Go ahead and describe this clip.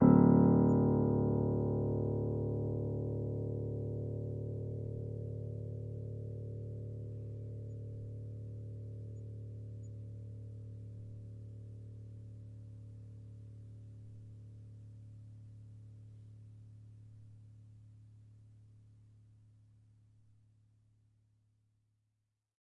upright choiseul piano multisample recorded using zoom H4n
choiseul, upright, piano, multisample